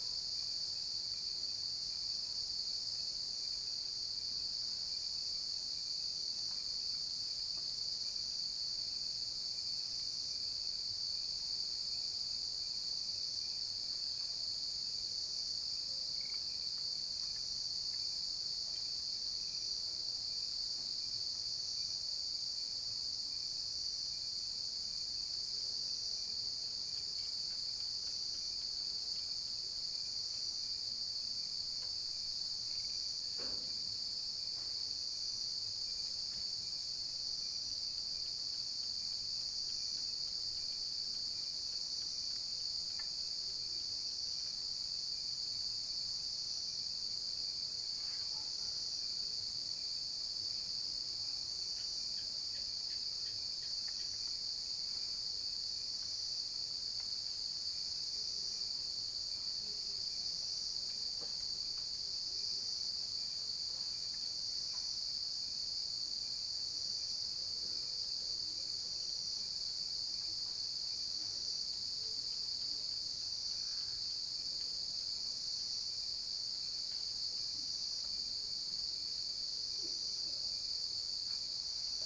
Recorded in the month of November in East India Village